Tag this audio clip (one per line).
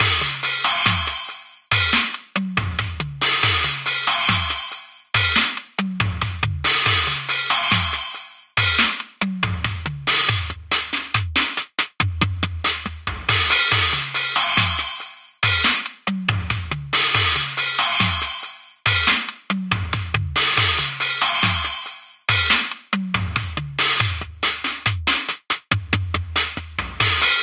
4
75bpm
drumloop